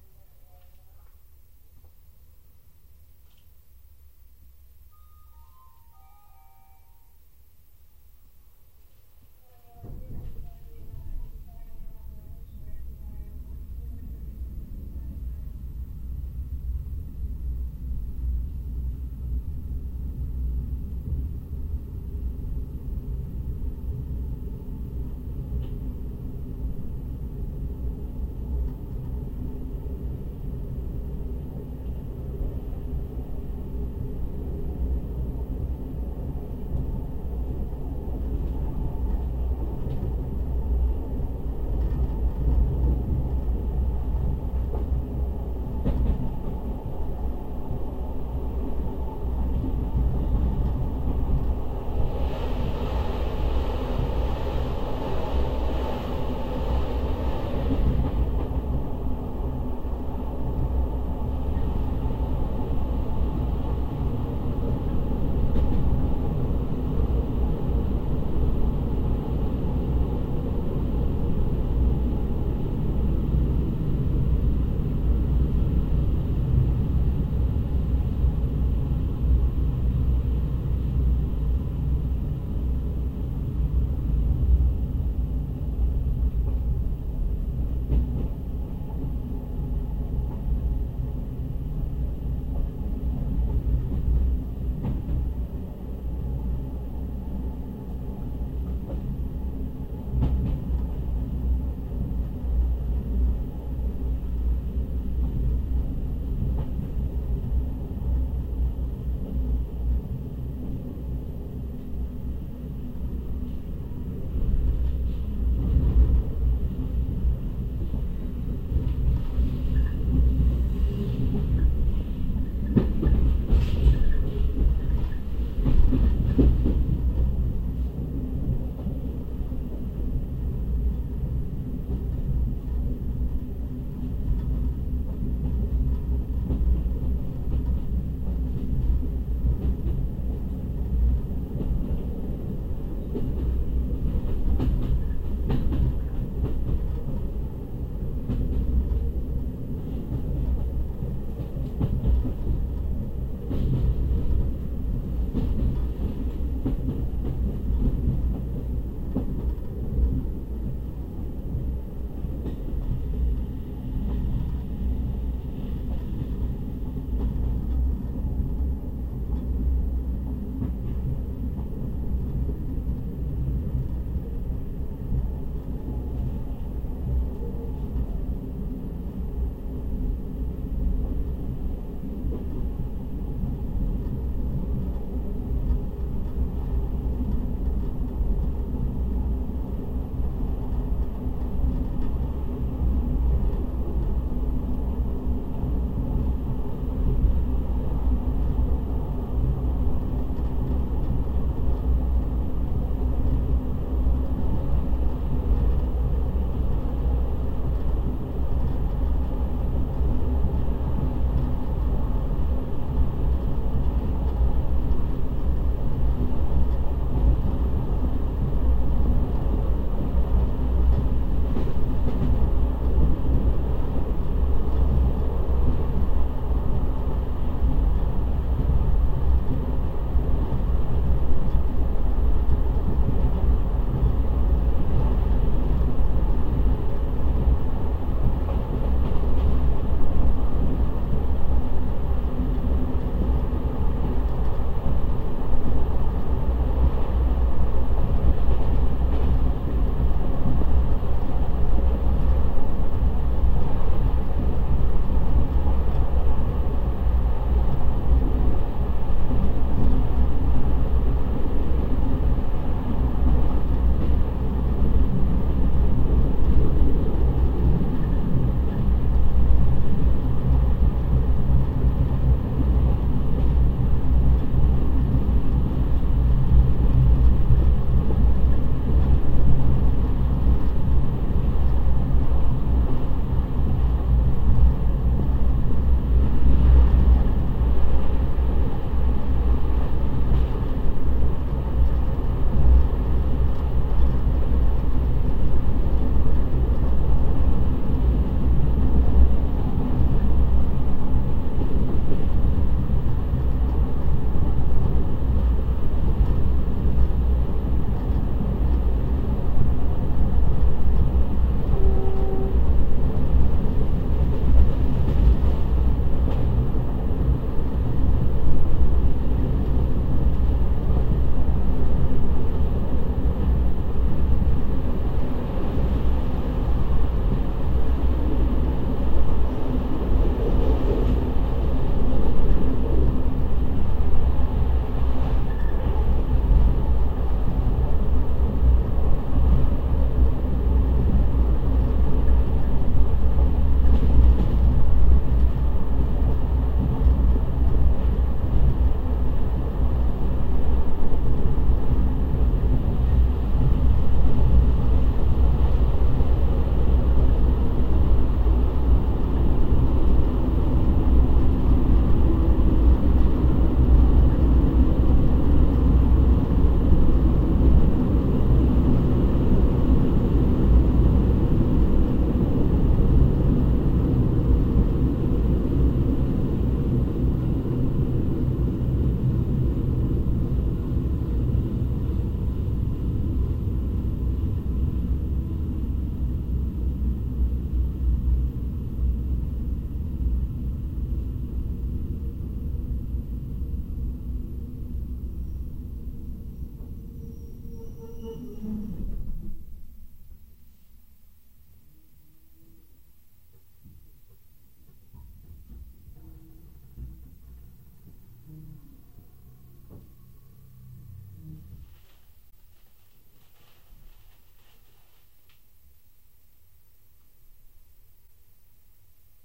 This was recorded on the Budapest train line, from station Budapest Keleti Pályaudvar to station Hatvan city. The recording was made between station Rákos and station Rákosliget. It was made by my MP3 player and a bit equalized and the noise was reduced.
budapest, horror, train